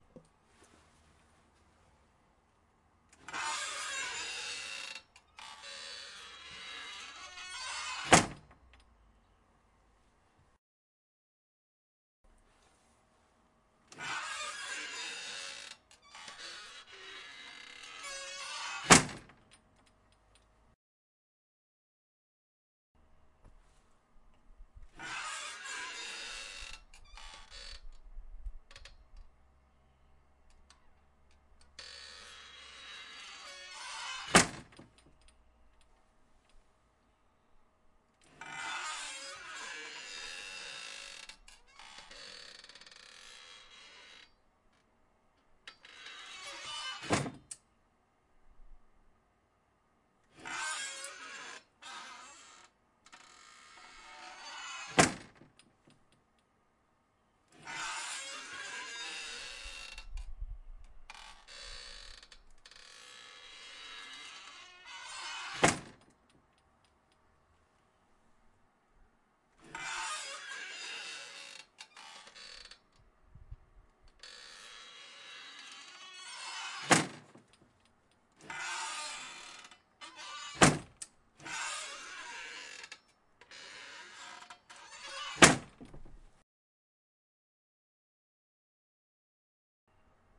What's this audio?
Sample Screen Doors
A few samples of the same old style screen door opening and closing. Can be edited to make a few different door sounds. Recorded on ZOOM H5
Open, Door, Screen, Squeaking, Old, Close